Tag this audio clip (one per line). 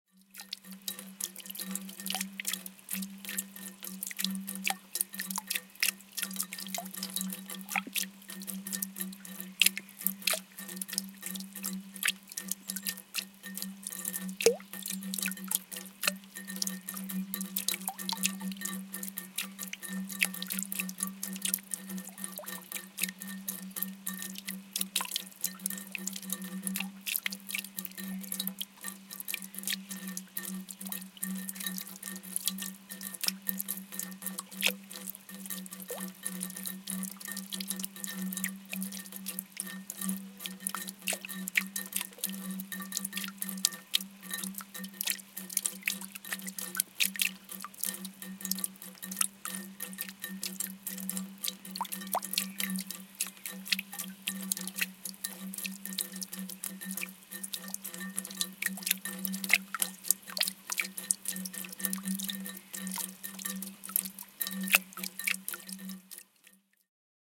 river
water
waves
nature
ambient
drop
splash
field-recording
trickle
babbling
stream
relaxing
splashing
gurgling
relaxation
gurgle
flow
creek
flowing
fountain
liquid
brook